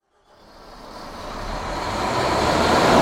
gen on
engine, generator, motor, starting